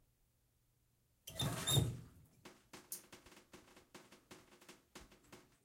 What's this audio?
Shower handle turn on and off quickly, water shutoff with drips
Quickly turning the water in a shower on and off, to record the clean sound of the water shutoff valve
drip, handle, off, shower, shutoff, turn, valve, water